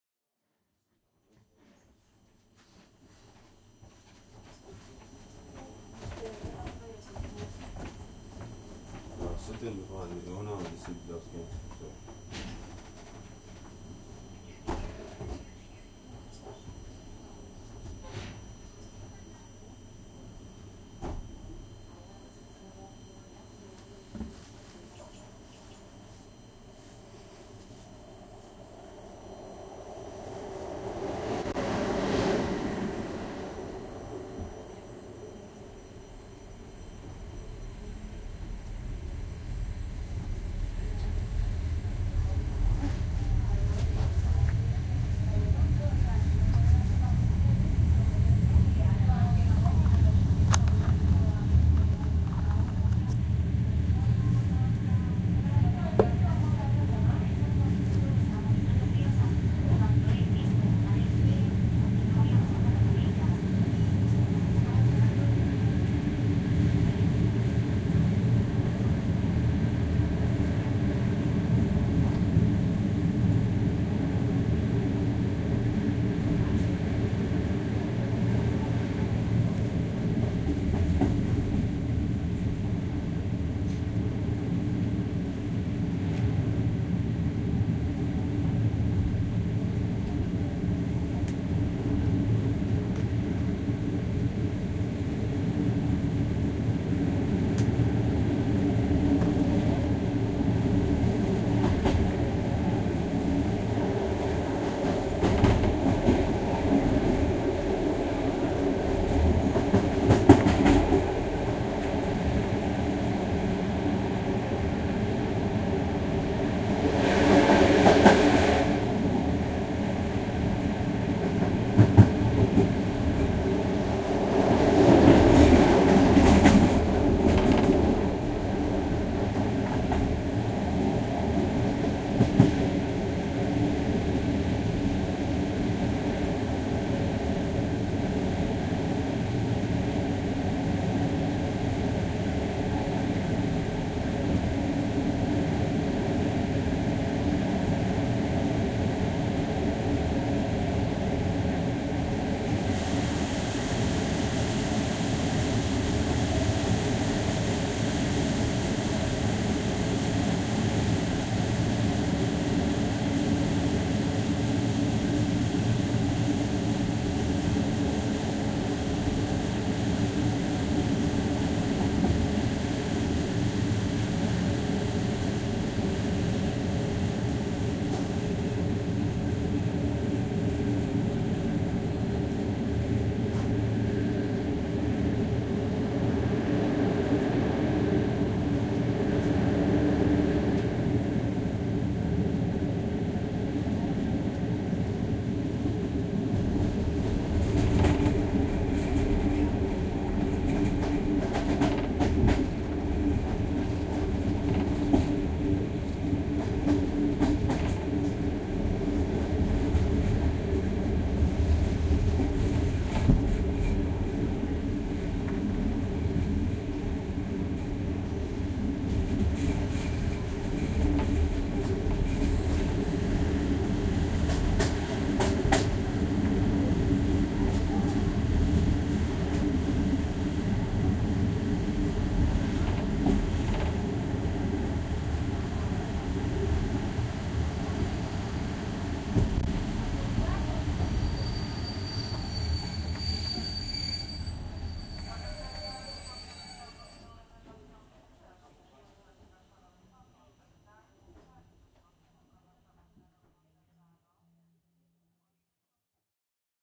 Train Journey RF
just another train journey, recorder edirol r09
ambient, atmophere, field, railway, recording, train